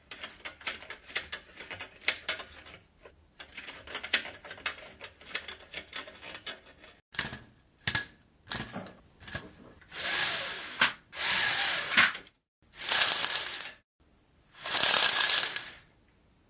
window-blinds-raise-lower-flutter-turn
Complete collection of blinds samples from my blinds pack.
bedroom, blinds, close, closes, closing, curtain, discordant, door, open, opened, opening, opens, portal, slide, sliding, squeak, squeaky, squeeky, swipe, window, wooden